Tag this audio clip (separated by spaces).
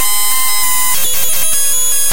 Technology Computer Lo-Fi Glitch Bitcrush